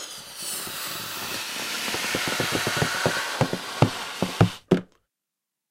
Balloon-Inflate-08-Strain
Balloon inflating while straining it. Recorded with Zoom H4